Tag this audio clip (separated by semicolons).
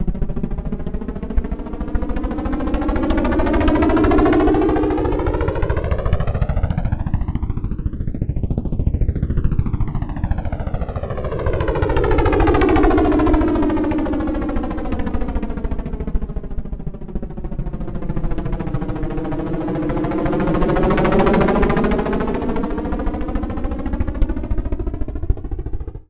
simulation; helicopter; synthetic; circling; circle; rotor; near